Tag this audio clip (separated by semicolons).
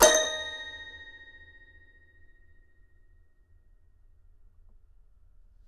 samples; note; keyboard; toy